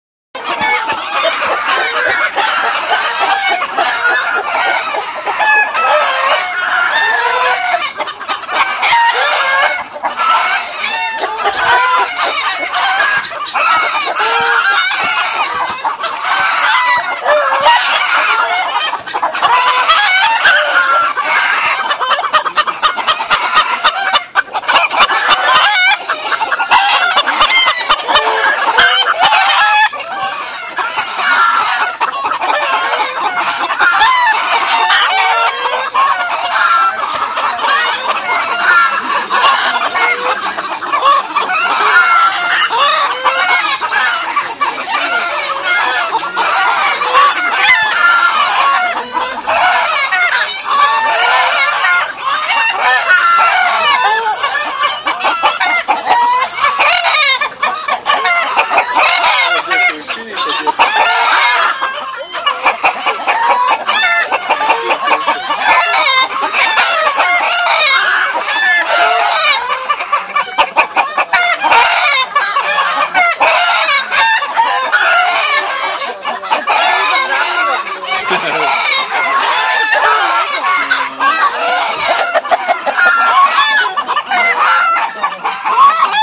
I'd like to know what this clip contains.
rooster mayhem
Rooster and hen mayhem at a tiny chicken zoo.
animals, birds, crazy, farm, field-recording, mayhem, rooster, zoo